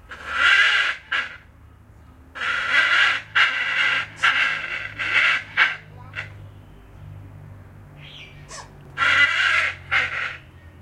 Papuan Hornbill 3
The harsh call of the Papuan Hornbill. Recorded at Le Jardin D'Oiseaux Tropicale in Provence.